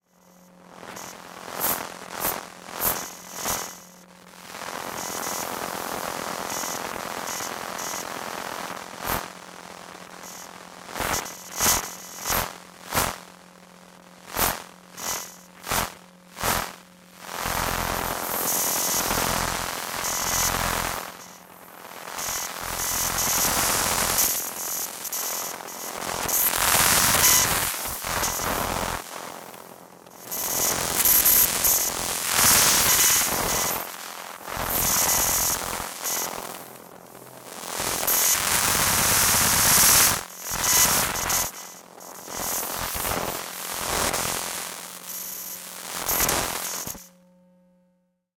Electromagnetic Waves on a Macbook Pro
This is the sound of electromagnetic waves from a Macbook Pro.
Design, Electromagnetic, Glitchy, Noise, scientific, SciFi, Sound, Static, Waves